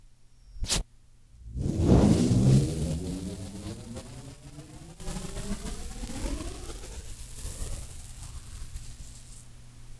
peeling tape off of a masking tape roll (reverse)